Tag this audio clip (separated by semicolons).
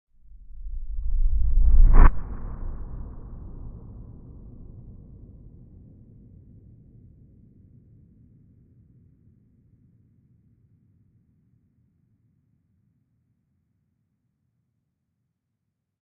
phase-vocoding; granular; viola; soundhack; repitch; processed; low; uplift; cinematic; maxmsp; ableton-live; synthesis